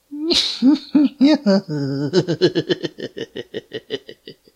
Evil laugh 03 - Gen 4
New laughs for this years Halloween!
Halloween, evil, laugh